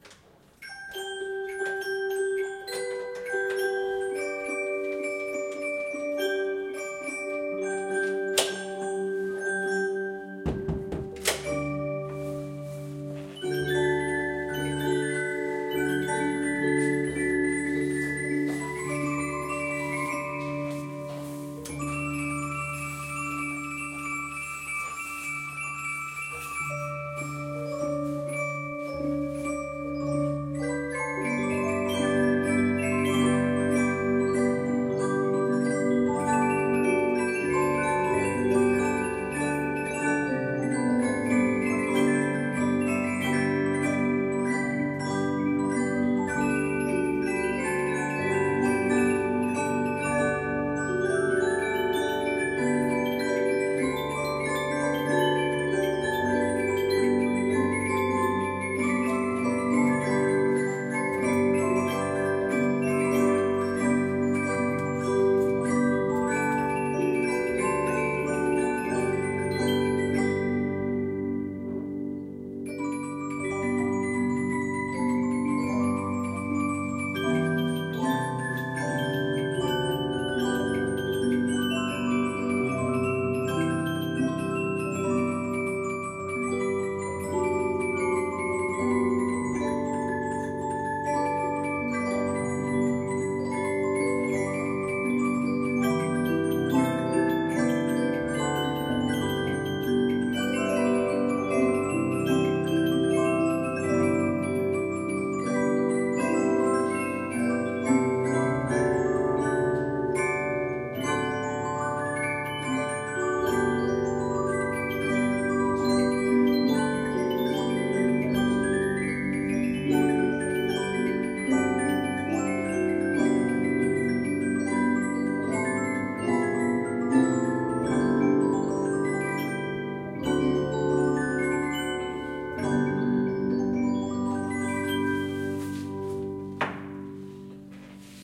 Musical Clock is building 1850 in Black Forest in Germany.
Original Recording more than 50 years ago, Composer is more than 70 years dead - Music is free from GEMA-Fees;
Recording: Tascam HD-P2 and BEYERDYNAMIC MCE82